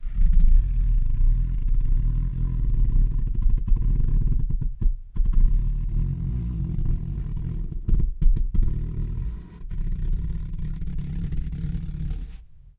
rustle.box-growl 9
recordings of various rustling sounds with a stereo Audio Technica 853A
deep, low, bass, box, rustle, growl, cardboard